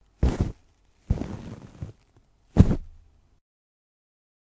I created this sound by lightly rubbing a tissue on the microphone. I'm not entirely sure what it could be used for, perhaps as an equip sound effect in a game? Anyways.. Hopefully it comes as useful to someone!
noise, clothes, Cloth, equip, dry, inventory, tissue